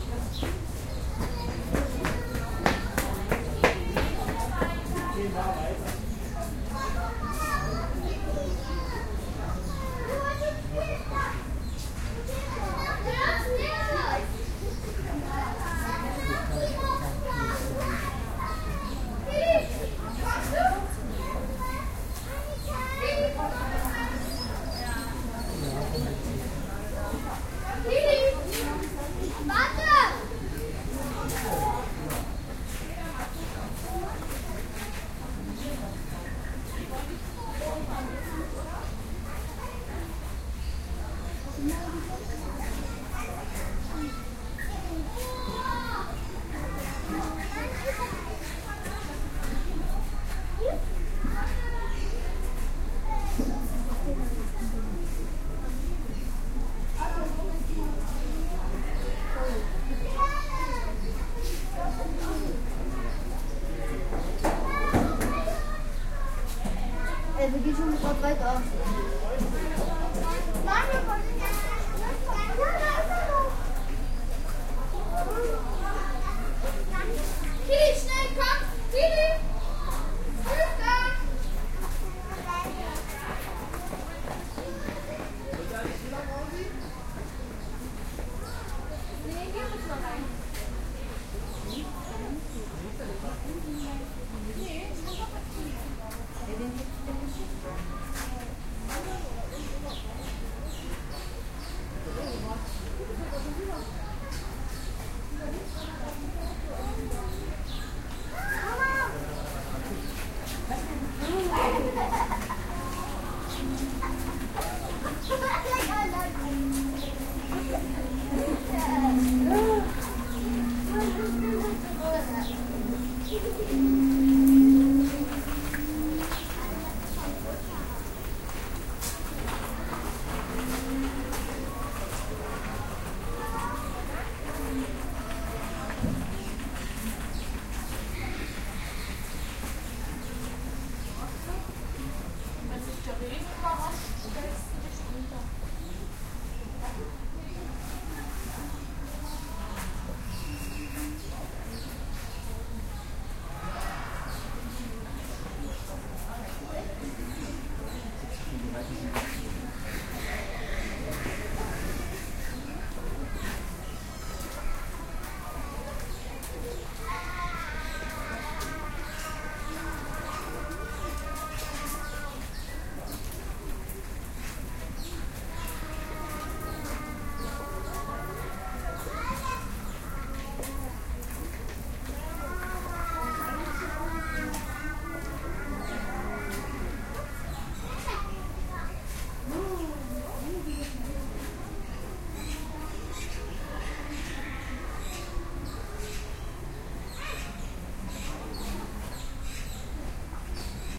Atmo - Zoo - Besucher - Eberswalde - 201107
Atmosphere of visitors of a zoo. In the end you can hear a electric car passing.
atmosphere, visitor, zoo